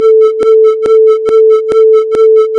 Stereo recording. I put an effect Wahwah ( frequency 1.5; phase departure 0; depht 70; resonance 2.5, frequency wah 30%.
I change the tempo (-12) and repeat the sound five times